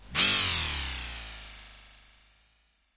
Artificial Simulated Space Sound 16
Artificial Simulated Space Sound
Created with Audacity by processing natural ambient sound recordings
alien, ambient, artificial, atmosphere, drone, effect, experimental, fx, pad, sci-fi, scifi, soundscape, space, spacecraft, spaceship, ufo